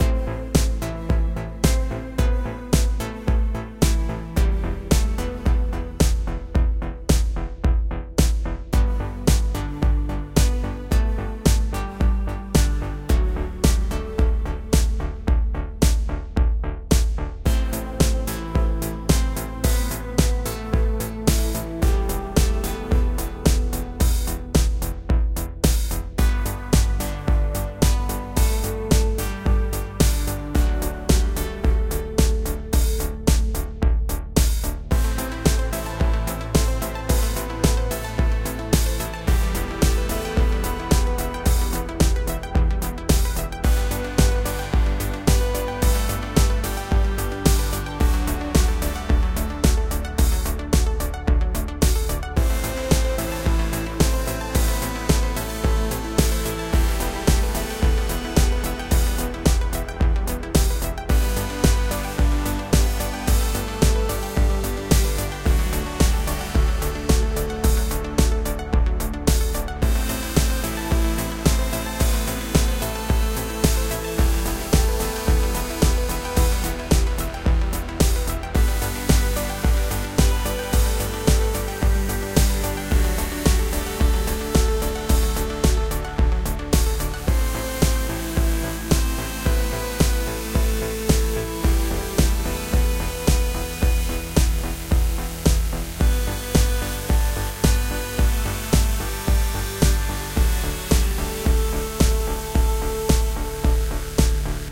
electro pop melody to remember 001.
Synths:Ableton live,Kontakt,Silenth1.
melody, pop, remember